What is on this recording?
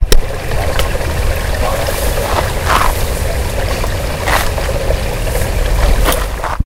aigua delta
The sound of the river. Recorded with a Zoom H1 recorder.
wind, water, Deltasona, elprat, caltet